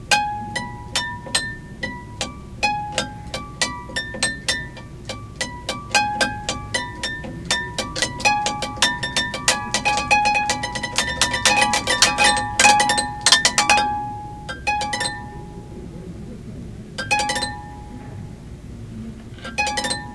YVONNE scaryguitar
The creepy sound of a guitar.
classical, nylon, Plucked, strings